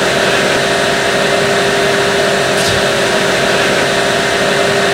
Created using spectral freezing max patch. Some may have pops and clicks or audible looping but shouldn't be hard to fix.
Atmospheric, Background, Freeze, Perpetual, Sound-Effect, Soundscape, Still